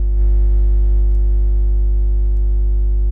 once i tried to sample a 303 but the phono
cable with jack adaptor was dodgy and i got this fantastic buzz which i turned into a bass sound: it filtered beautifully. this is a more recent version of the same idea.
broken, buzz, cable, minijack, noise